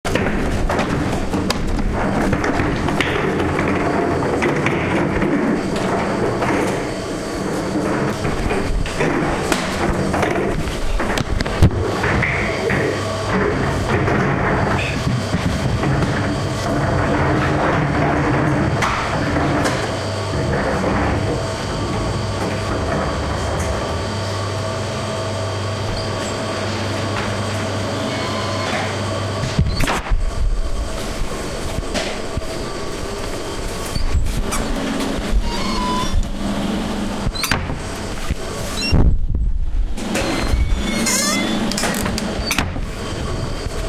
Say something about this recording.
industrial sound design